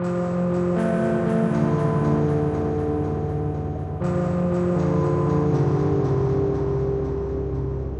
Pitched Bell 03
2, bell, iris, low, pitched, sampled, slow